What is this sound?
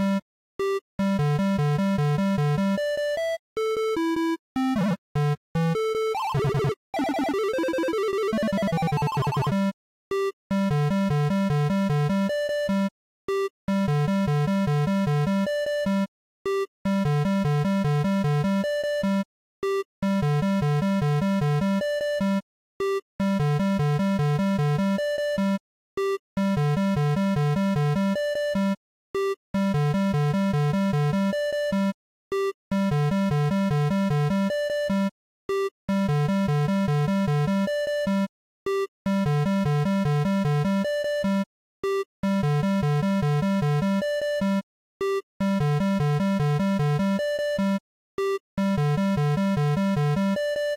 Pixel Song #2
happy, Pixel, loop, music, basic